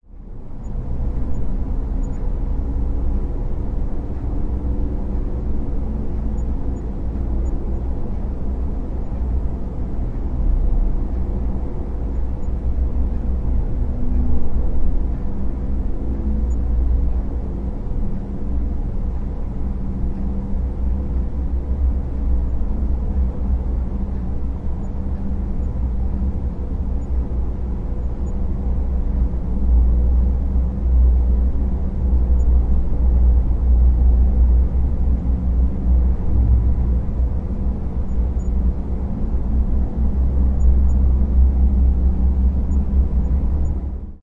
A quiet bedroom room tone with an ambient background
background, quiet, air